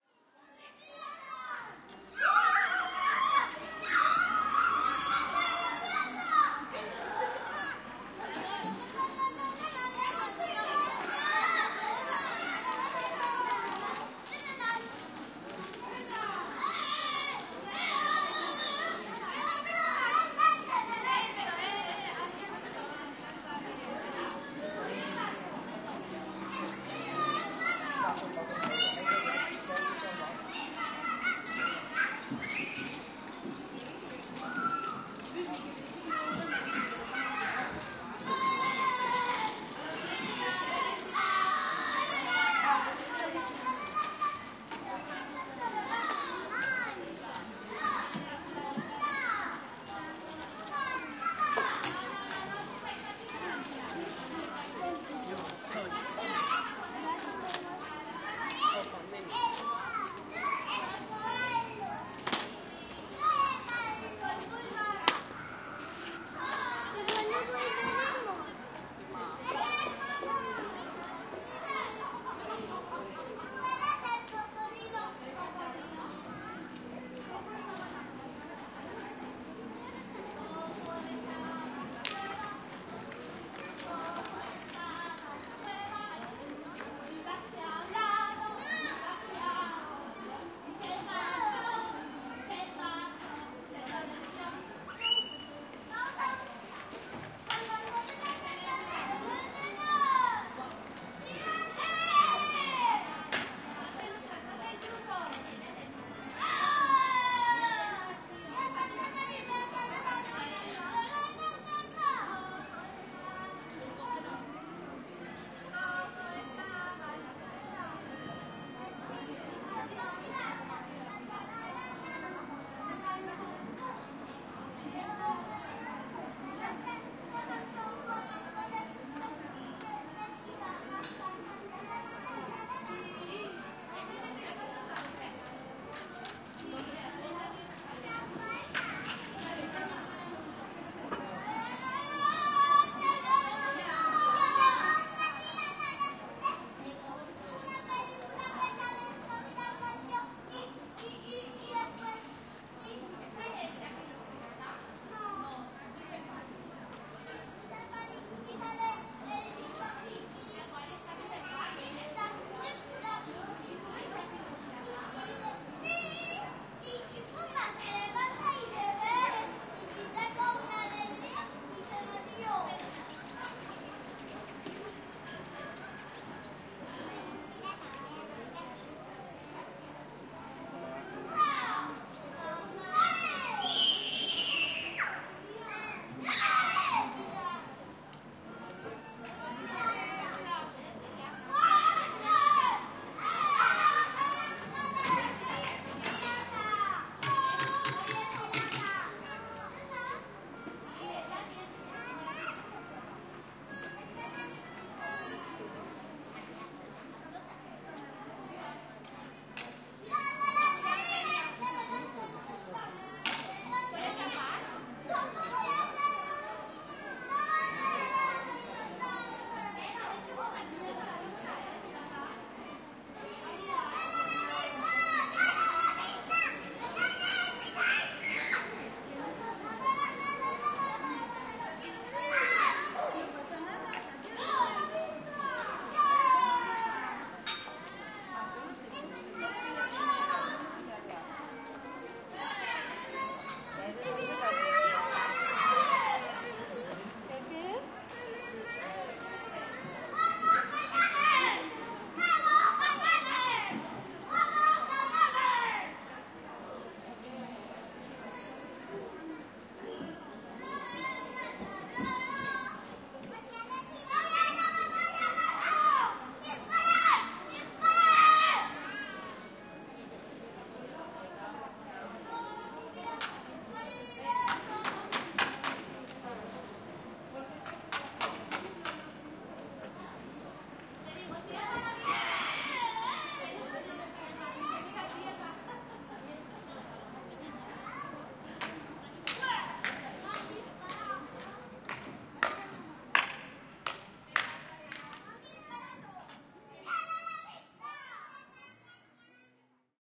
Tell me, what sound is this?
Recording a small playground with children and their parents in an autumn afternoon in Gandia Spain